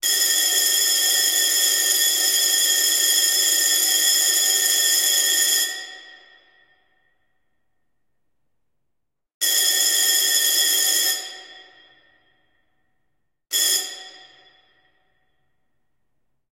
Vintage School Rings
HQ vintage rings. Alarm. Long, mid, short